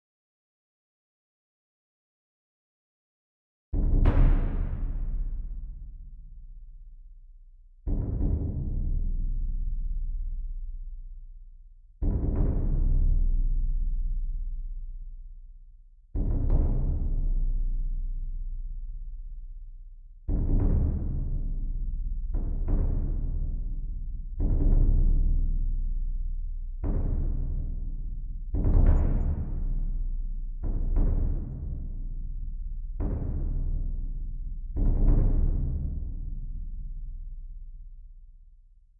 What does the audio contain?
Bass Drums
Sample of Big Drums playing various rhythms in the time signature of four-four
Drum, Bass-Drum, Big